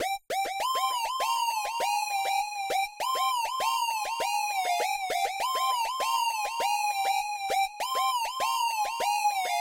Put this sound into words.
Square Bubble Lead
Square lead from an unfinished song. Created using the 'BS Famous bubble sound' from the 'ASDR Sounds Dub All The Steps Vol. 2' sound pack for Massive, as well as some simple echo.
If you use this in a song, I request that you link the song in the comments. I like seeing how the things I make are used. :D
loops, Synthesizer, EDM, loop, synth, 8-bit, 100BPM, Solo, electronic, Electric, hook, Lead, key-of-C